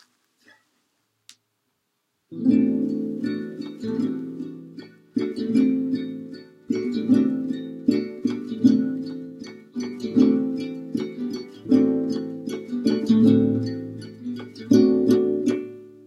Acoustic guitar chords. Me just playing something on guitar and recording it.